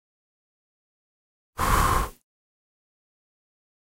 A short blow from the mouth